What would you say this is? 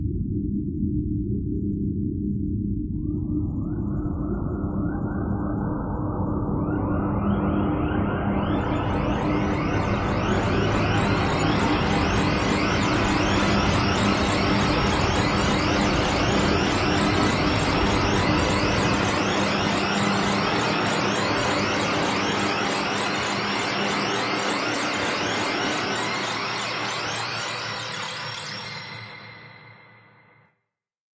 the swarm w12gcx
In the darkness a swarm of screeching animals appears. Instead of disappearing the animals suddenly fall silent. A synthetic layered sound.
birds, impending, swarm, animals, twitter, frightening, appear, near, squawk, artifical, insects, alarming, screech, synthetic, swell, rise, noise